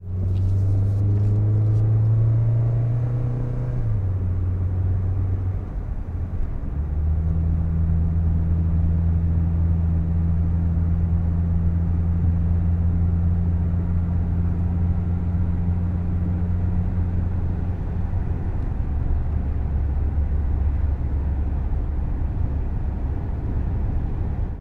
Car acceleration inside
Recording inside a moving vehicle as it accelerates.
God bless!
acceleration, ambiance, car, field-recording, free, inside-car, inside-vehicle, low-tone, passenger, quality, vehicle